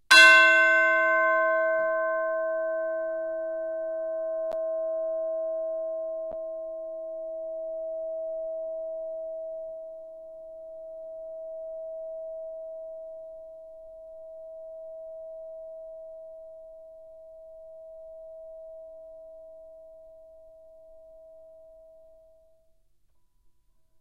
chimes c4 ff 1
Instrument: Orchestral Chimes/Tubular Bells, Chromatic- C3-F4
Note: C, Octave 2
Volume: Fortissimo (FF)
RR Var: 1
Mic Setup: 6 SM-57's: 4 in Decca Tree (side-stereo pair-side), 2 close